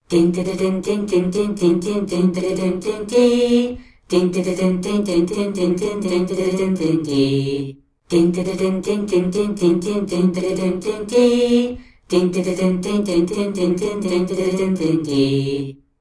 Teenage Ant Marching Band
Sounds like the ant marching bands in the old Warner Brothers cartoons. Just not as cute.
Recorded with Zoom H2. Edited with Audacity.